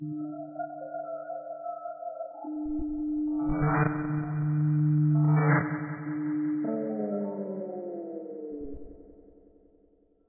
cd load minisamp
sound of a CD drive loading a CD / reading the header. background fuzz filtered, stereo delay added.
experimental, industrial, lo-fi, machines, sci-fi